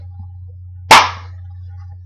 Foley- Face slap #2
This is a face slap. You might need to make it lower in editing cuz its really loud. Check out, my other sound effects, Punch Hard, and Great Punch #2! They are awesome, and..... Their Free!
face, fight, Hard, ouch, Slap